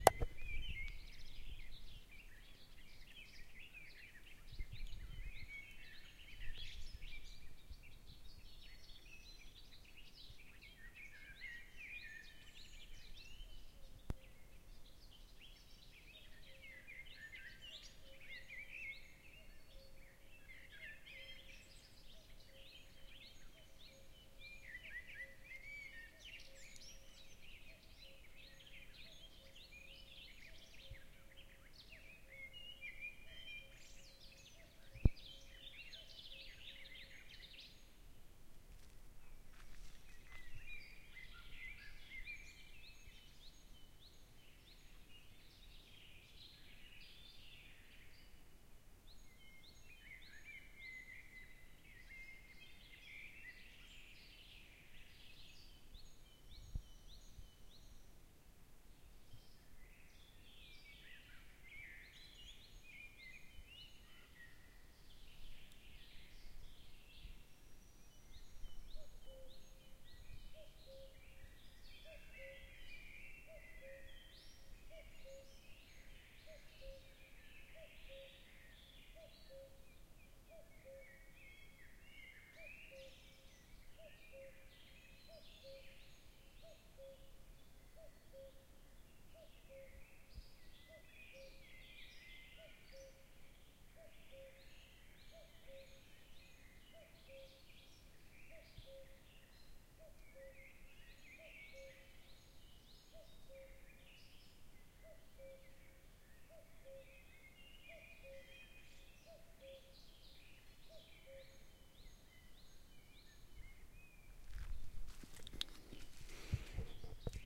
Birds singing and chirping in a forest early one summer morning in Finland.
birds, forest, morning, summer